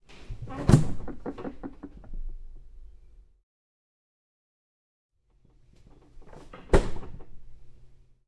Fridge Door Open, Close
A refrigerator door opening and closing. Recorded with a Fostex FR-2 LE.
close, door, fridge, open, refrigerator